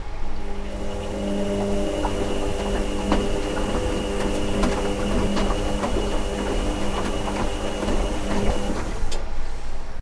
washing machine slow spin
drum, machine, rinse, spin, wash, washing